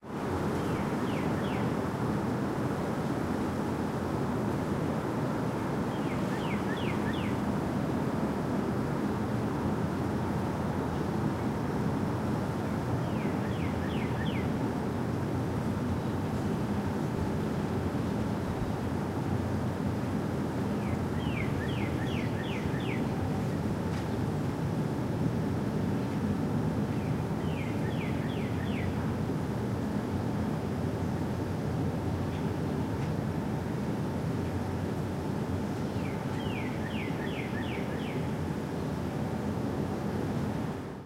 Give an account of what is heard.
Air tone in a city from the 9th floor
Recorded in a building.